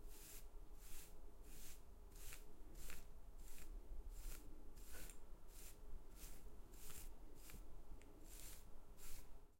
hair comb
ZOOM H6
bathroom, hair, comb, brush